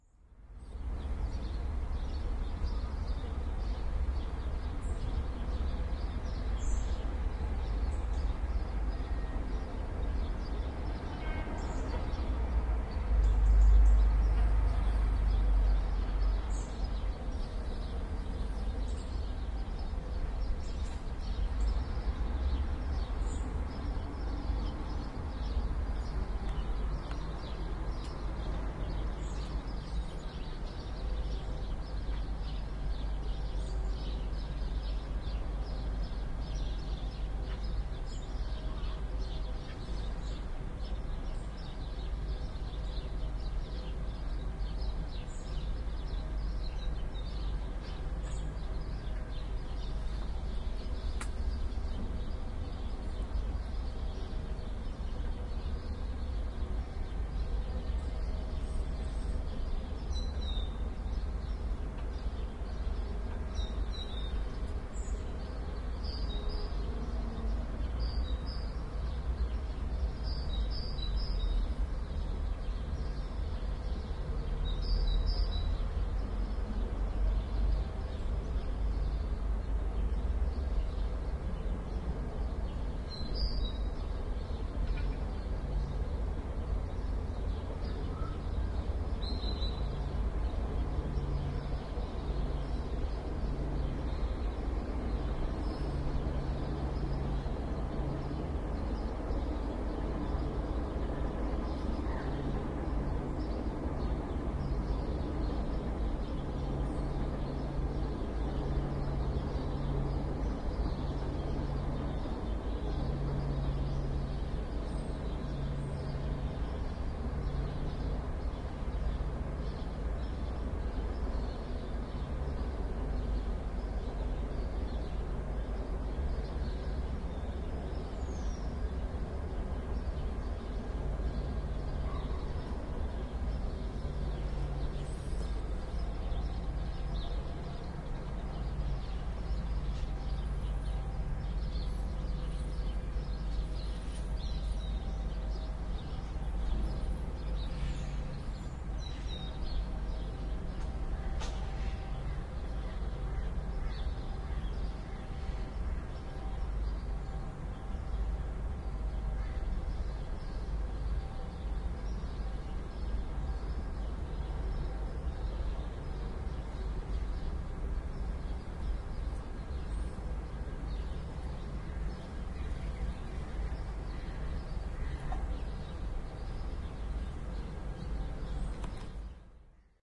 urban morning noise
About half past seven in the morning. Westerpark Amsterdam. The birds, especially the sparrows are very active as well as the road sweepers in their sweeping trucks which you hear in the background. A crow, a few moorhens and other birds, I don't know their names, can be heard and also neighbours leaving home for work. Recorded with an Edirol R-09 on Friday the 13Th of October 2006.
traffic, birds, engine, field-recording, nature, street-noise, street